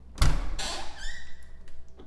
porta abrindo 1
Door opening inside an empty building.
lock, door, reverb, wood, open